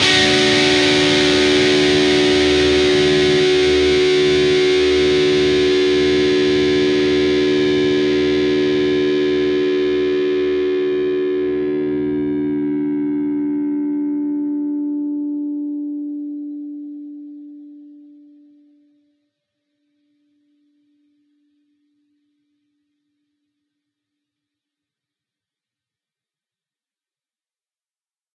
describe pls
Dist Chr Dmj 2strs 12th
Fretted 12th fret on the D (4th) string and the 11th fret on the G (3rd) string. Down strum.
chords, distorted-guitar, distortion, distorted, guitar-chords, guitar, lead-guitar, lead